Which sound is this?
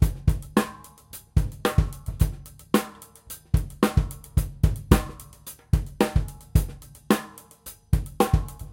Hihat10 4m 110bpm
Acoustic drumloop recorded at 110bpm with the h4n handy recorder as overhead and a homemade kick mic.
acoustic, drumloop, drums, h4n, loop